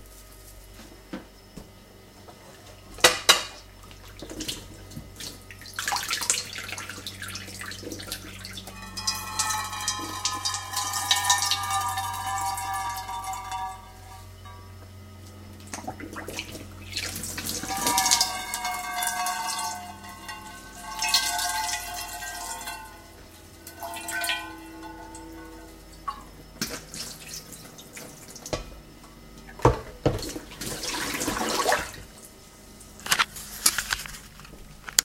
Water onto frying pan
A strange sound giving the sense of spireling all falling.
Frying, fi, Pan, SFX, Sci, Kitchen, Water